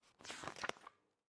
Soft Cover Book Open 2
Open, Soft-Cover-Book, Paper, Book, Page